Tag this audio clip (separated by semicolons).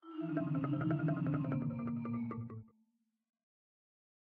170bpm; Marimba; Rumble; Warped